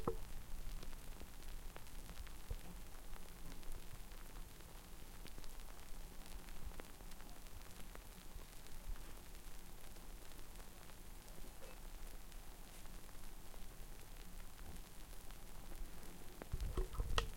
I recorded the subtle crackling sound beer foam makes after you pour it into the glass. I needed an old record player sound so I improvised. I was pretty pleased with the result